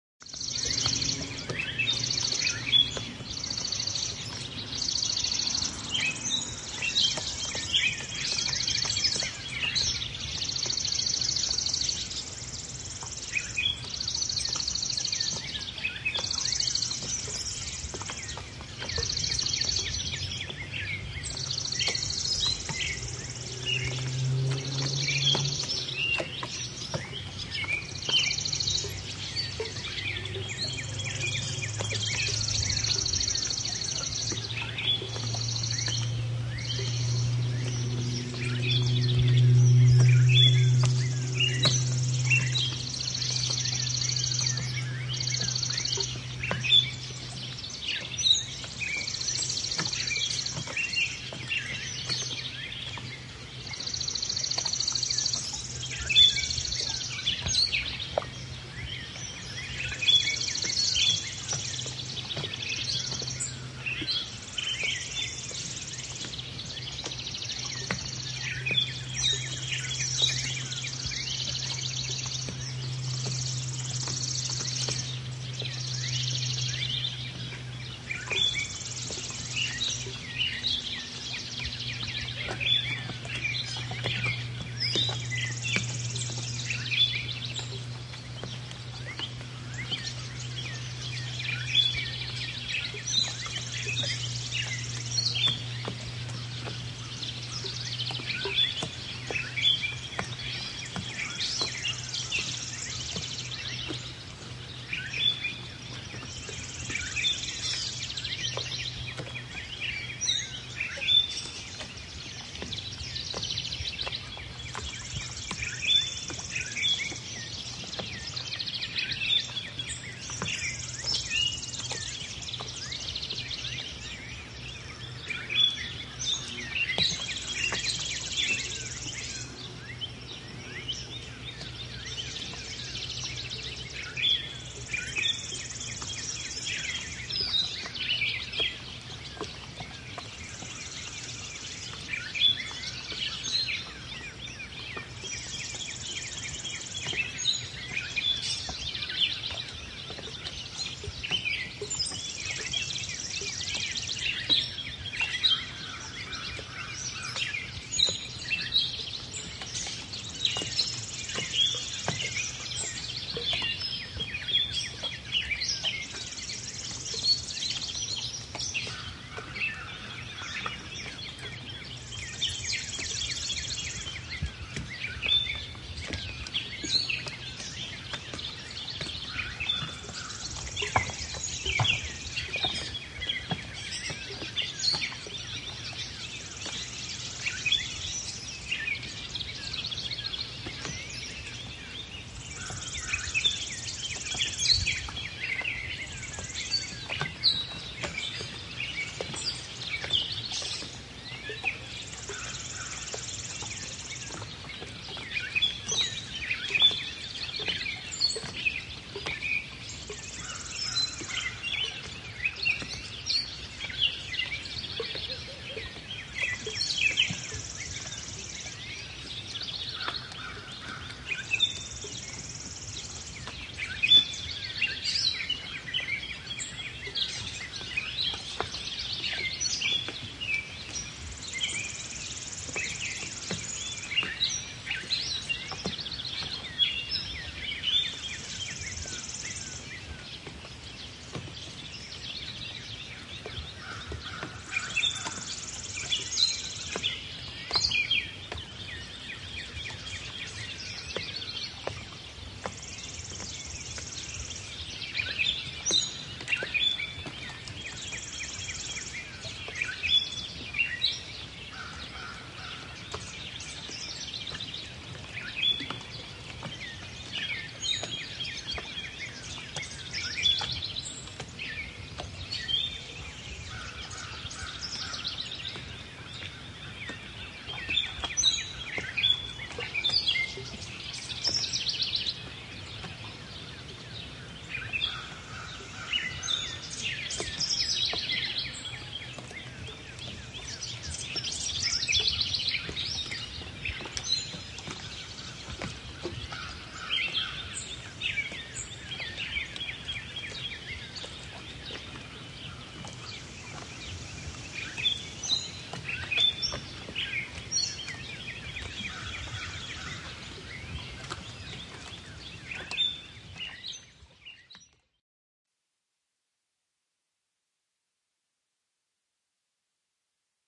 Dock - 6 am
Recorded on a Zoom H2 at Bass Lake, Indiana during sunrise.
birds, dock, h2, soundscape, water